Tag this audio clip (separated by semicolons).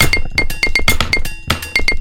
unprocessed; drum; loop; dry; rhythm; reactable; kitchen; beat